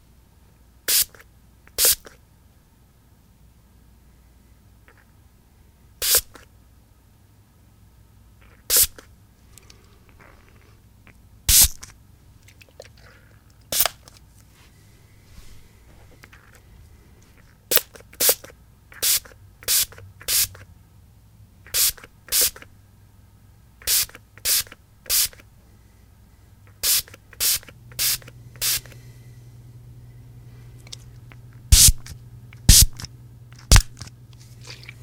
Several variations of a spray bottle squirting out mist.